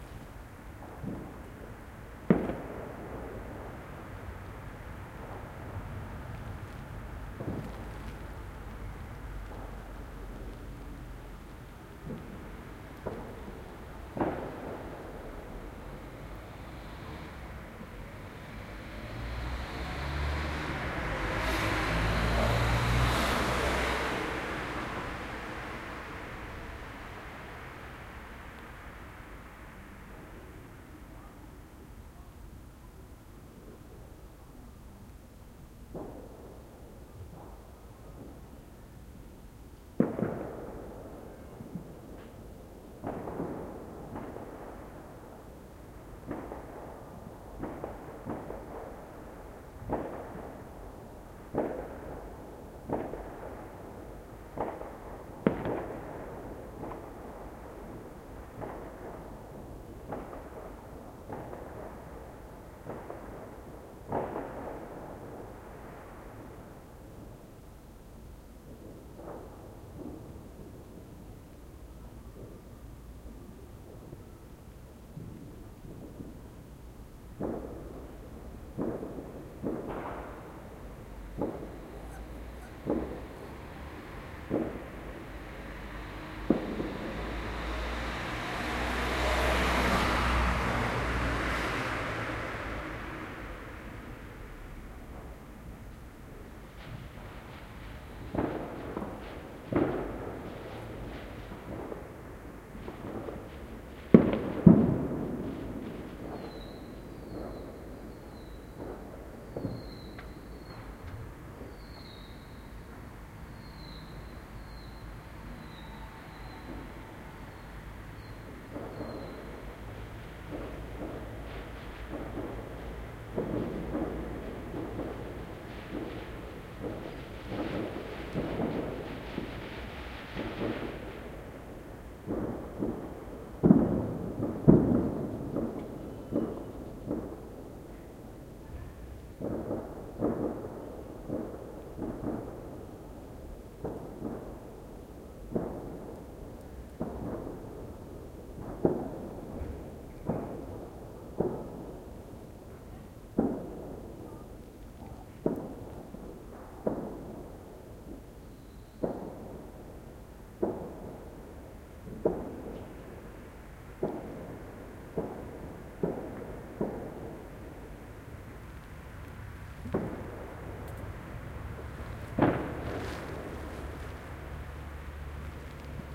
Distant Fireworks
New-Years Fireworks in the distance as heard from a place. Almost isolated safe for a car that drove by twice and one of my rabbits squeaking a few times. Individual bits might even pass for war-time atmosphere.
fire-works, fireworks, adpp, distant, fire-crackers, rockets, car, explosion